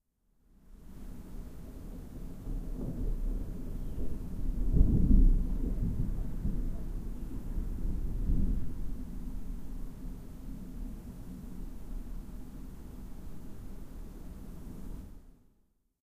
One of the 14 thunder that were recorded one night during my sleep as I switched on my Edirol-R09 when I went to bed. This one is quiet far away. The other sound is the usual urban noise at night or early in the morning and the continuously pumping waterpumps in the pumping station next to my house.
bed, body, breath, field-recording, human, rain, thunder, thunderstorm